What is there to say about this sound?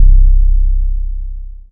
Sub Mania 01
SUB BASS SUBBASS
bass, sub, subbass